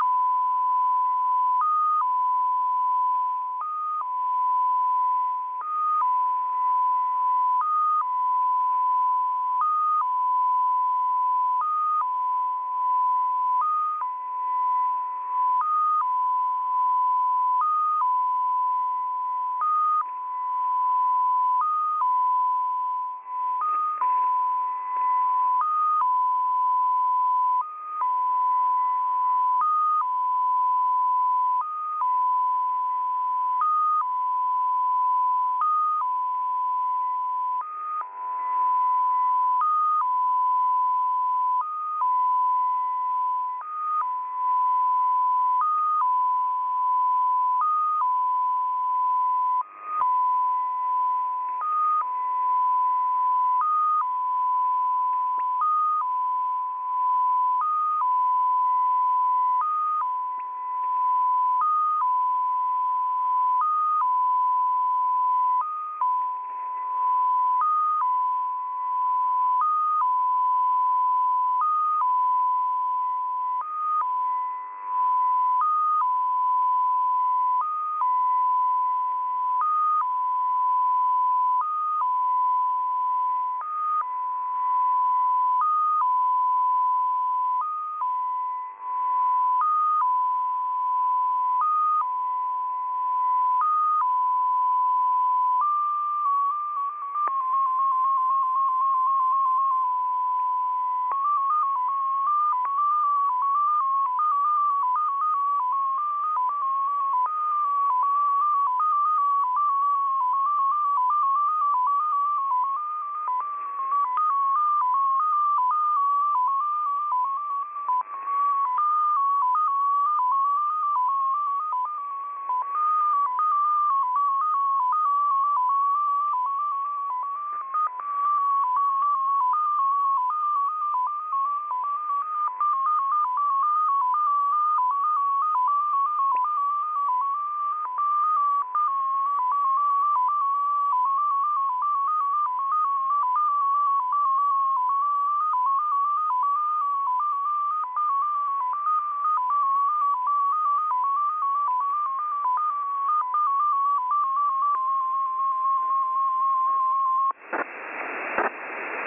Recording of the digital numbers station XPA2 on shortwave, which uses various tones to signify the numbers. Picked up and recorded with Twente university's online radio receiver.
beep, electronic, encrypted, FSK, MFSK, numbers-station, polytone, radio, shortwave, tones, XPA, XPA2